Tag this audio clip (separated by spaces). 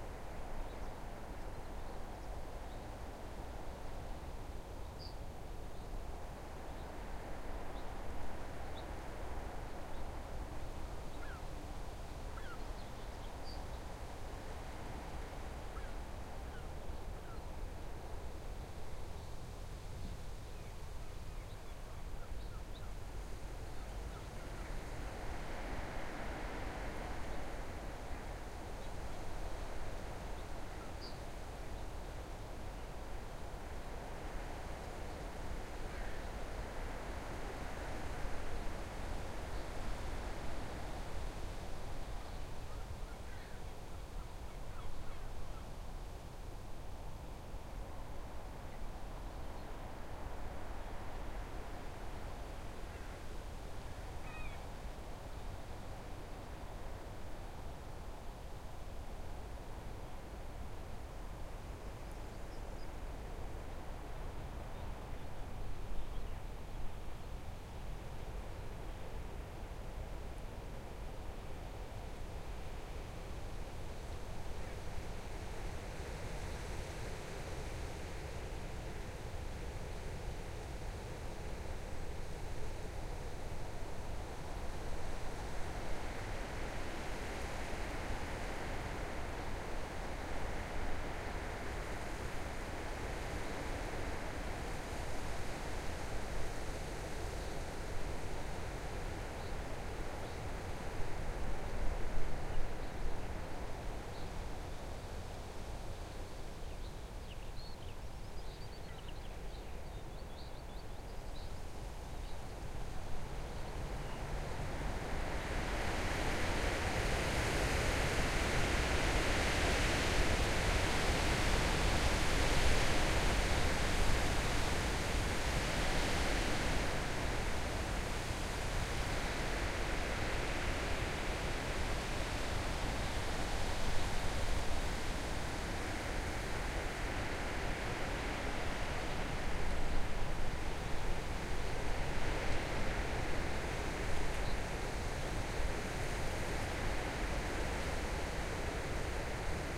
garden heavy-wind microphone skylarks test testing weather wind windshield